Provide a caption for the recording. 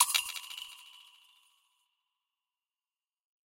Metal Drops 5
Tweaked percussion and cymbal sounds combined with synths and effects.
Abstract, Drops, Metal, Metallic, Percussion, Sound-Effect